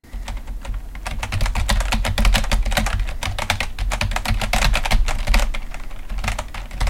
Sound Design Class Keyboard edited
Just a simple recording of me typing on some keys.
computer, keyboard, typing, keystroke, type